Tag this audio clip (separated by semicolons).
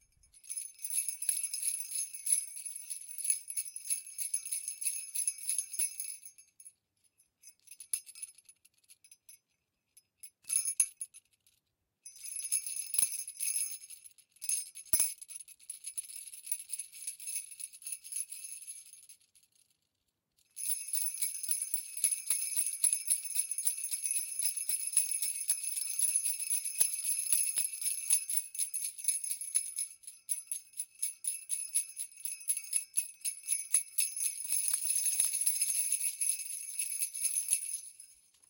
bell; bells; chimes; claus; clause; jingle; magic; metallic; percussion; santa; sparkle; sparkly; toy